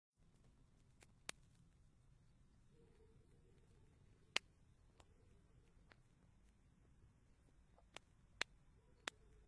Sound of candle being burnt
candle, flame